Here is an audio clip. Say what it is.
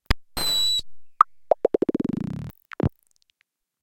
A really strange FM patch that I made on my Nord Modular, he really has a mind of his own.
modular, fm, glitch, synth, nord, funny, noise